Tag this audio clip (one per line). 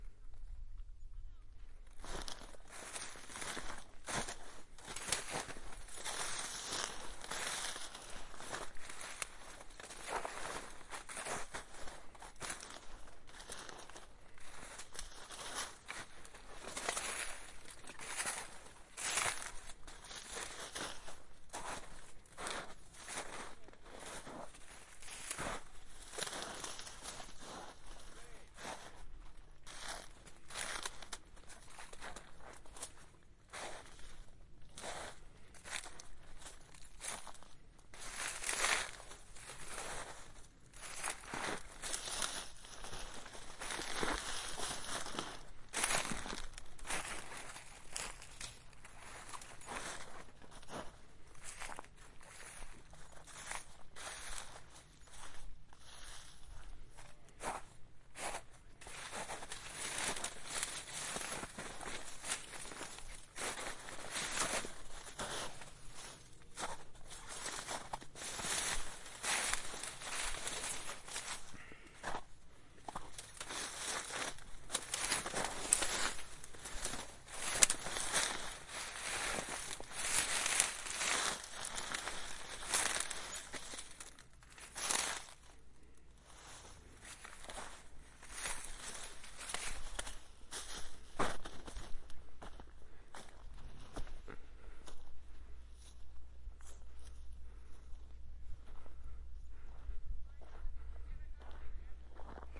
binaural
field-recording
snow
winter